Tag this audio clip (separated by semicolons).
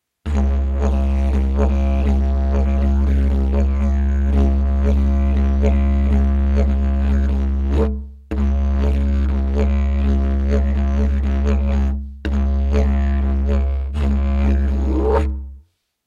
aerophone; didgeridoo; didgeridu; didjeridu; filler; loop; rhythm; wind; world-music